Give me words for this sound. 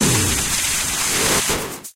interface saw 02 122bpm
Alvarez electric through DOD Death Metal pedal mixed to robotic grinding in Fruity Loops and produced in Audition. Was intended for an industrial song that was scrapped. Approximately 122bpm. lol
dubstep, electric, grind, industrial